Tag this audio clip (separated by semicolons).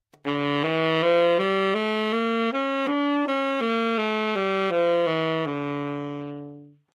neumann-U87; tenor; Dminor; good-sounds; sax; scale